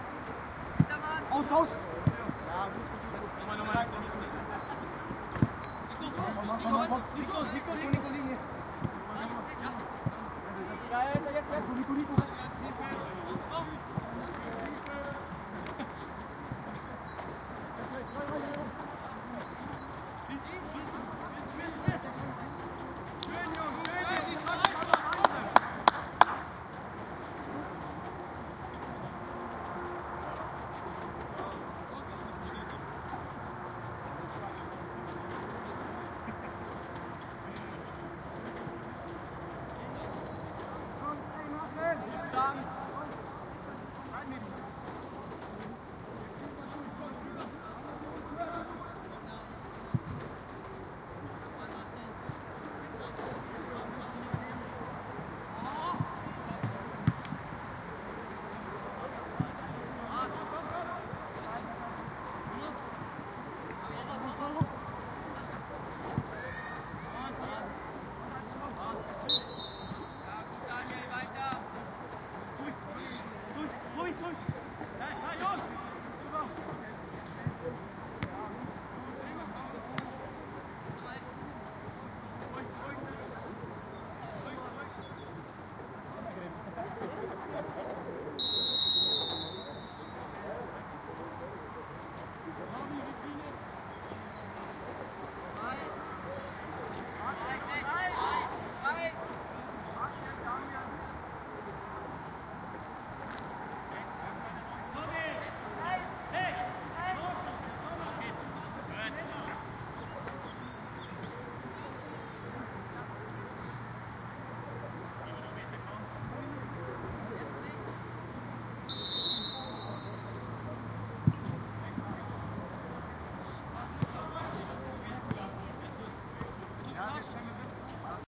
Fussball Soccer Teens Atmo Away
Some Atmos with Kids (14-16) playing a real soccergame / Coach shouting / Parents around / The language is german. Good for Background to have a real game going on.
There are different Moments edited together - so listen to the complete Clip. Hopefully u find the right little moment. Good luck and have fun
atmo, atmosphere, ball, coach, football, fussball, game, german, goal, Jugendliche, kick, kids, match, play, shooting-ball, shouting, soccer, sport